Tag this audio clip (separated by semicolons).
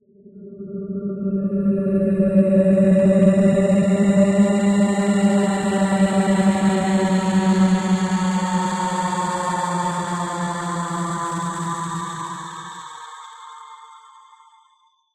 Ghostly; noise